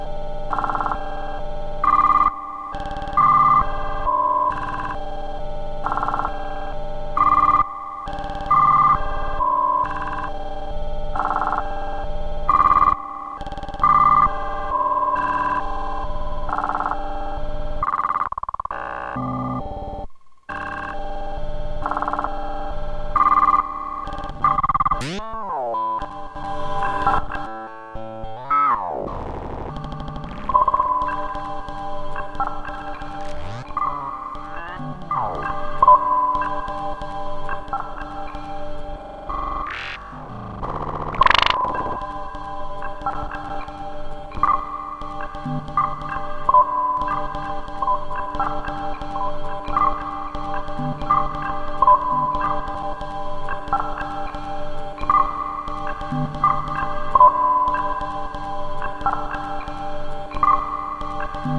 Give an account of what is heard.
A rhythmic sequence of sounds glitchy but melodic. I forget how I made this.
rhythm, sequence, glitch, digital